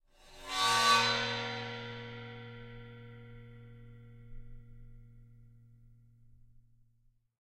Cymbal recorded with Rode NT 5 Mics in the Studio. Editing with REAPER.
groove, crash, mini, beat, bowed, drum, one-shot, splash, drums, bell, meinl, zildjian, percussion, ride, sound, sample, special, sabian, hit, cymbal, paiste, china, cymbals, metal